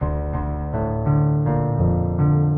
Few notes being played on digital sampled piano.
few-notes, piano, low, notes, samples